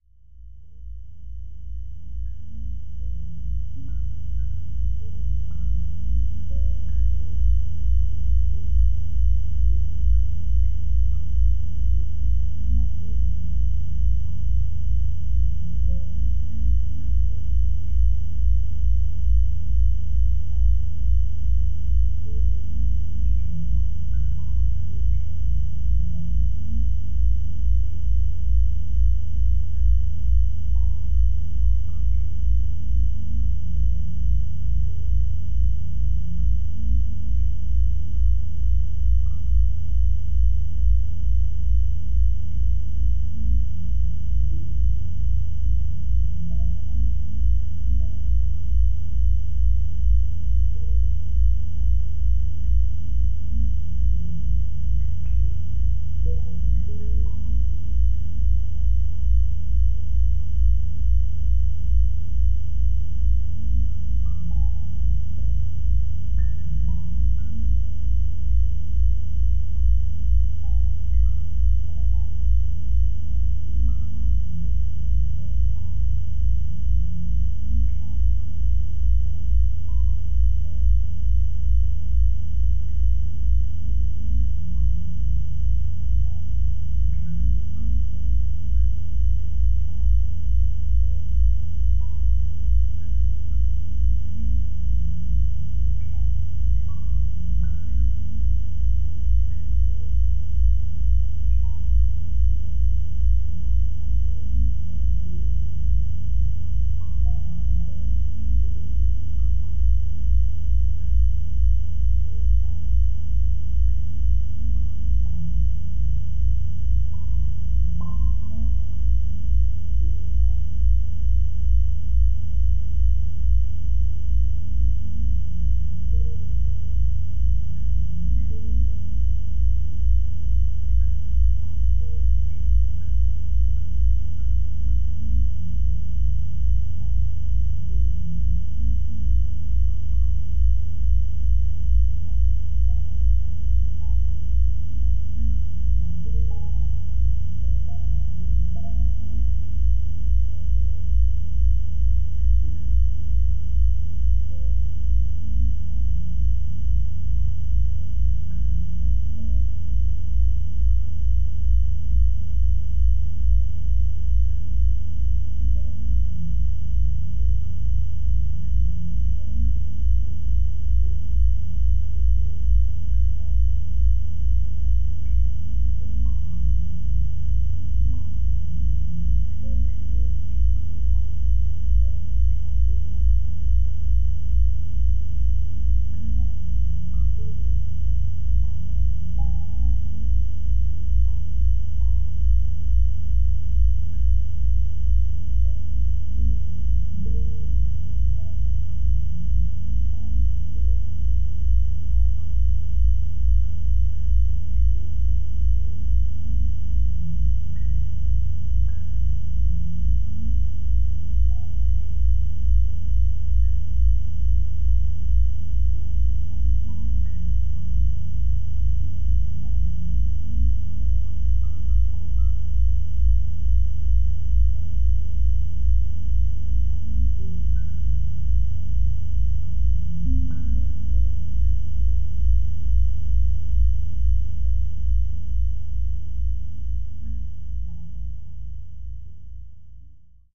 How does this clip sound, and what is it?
Dark Background Cave 1
A dark ambient background sfx sound creating a mysterious feeling for your game. Perfect for cave, dungeon, industrial, etc.
Looping seamless (with short fades).